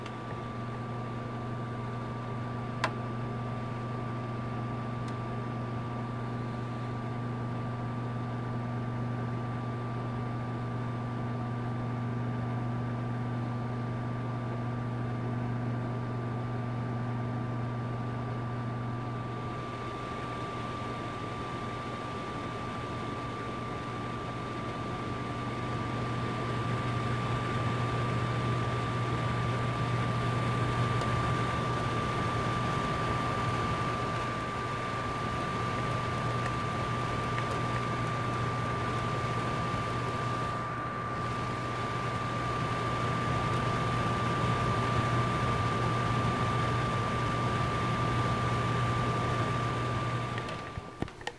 Sounds of a space heater running